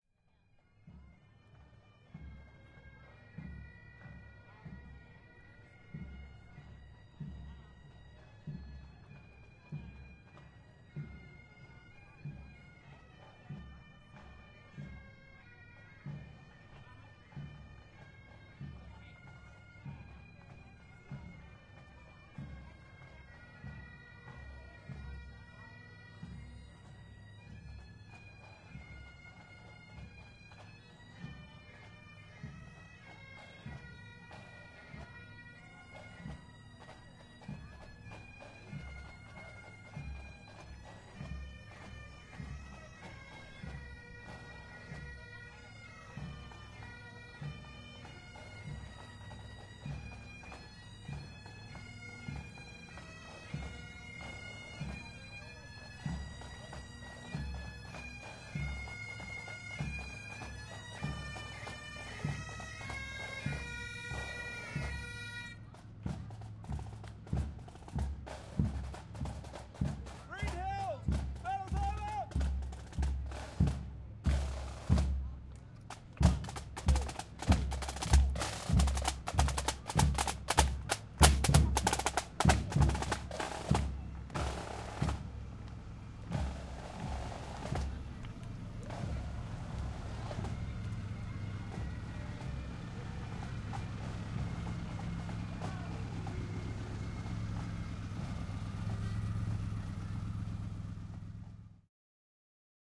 The Castlemaine Show happens every year in October. This year I went along with my trusty zoom H4. I love a pipe band, in this recording you geat a great stereo perspective of the band approaching from the distance and walking past. The next item in the street parade were the hot rods.
Australia, australian, bagpipes, field-recording, parade, scottish-pipes, street-parade